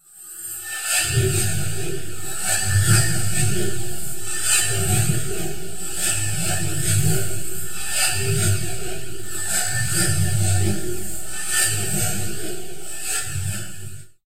Spasmodic Rhythm Machine
Different sounds mixed together.
A part of a percussion loop with a very special treatment...
Turn, Zarb, Slice